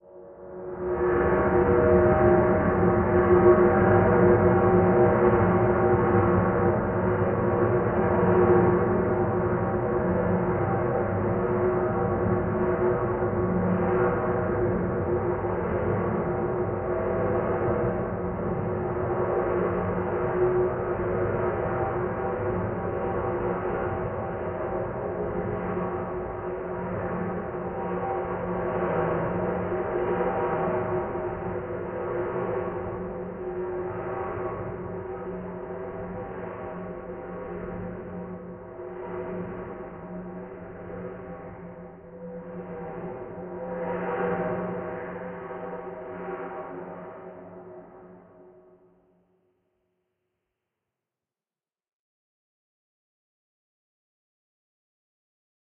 A drone - recording of a saucepan lid span on a ceramic tiled floor, reversed and timestretched then convolved with reverb.
All four samples designed to be layered together/looped/eq'd as needed.